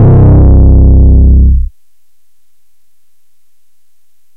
made with vital synth
808, bass, beat, drum, hard, kick